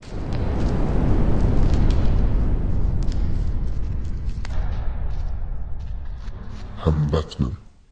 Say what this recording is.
BARBOSA Tamisa 2017 2018 Batman
To make the city’s atmosphere, I recorded some steps and a plastic bubble wrap. I changed the sound’s pitch to make it a lot deeper. I also created a noise sound for 6 seconds, I made a fade-out, then I duplicated and changed its speed to 20% slower. I did it 4 times, and then I used a low pass filter with -100 Hz frequency in the first sound. I did the same thing with the others copies, changing the frequency in each. Then I amplified the sound to make the volume higher.
The voice saying “I’m Batman” is recorded. To edit it, first I analyzed the noise profile and then I used the noise reduction into it. After, I duplicated the track and I turned the pitch into -16 dB in the first track and -20 dB in the second track. I put everything together setting the right time to each and changing its volumes to make it more comprehensible.
Typologie/morphologie de P. Schaeffer
batman, comics, film, gotham, movies